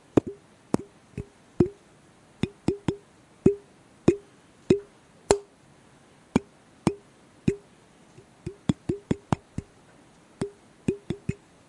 tap finger on small plastic bottle
bottle, hit, percussive, plastic, pop, tap